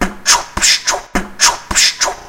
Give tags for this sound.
beat; beatbox; hip-hop; loop; male

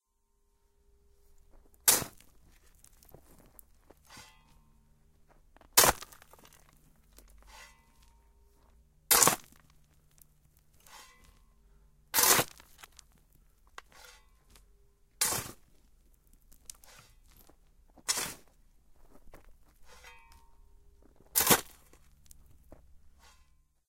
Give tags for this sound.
digging; spade; shovel

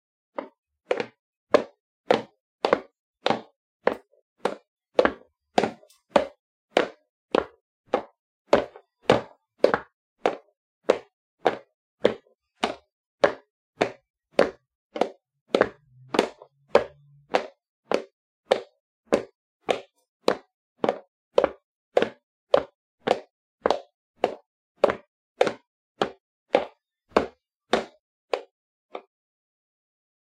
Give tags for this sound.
session; sonido

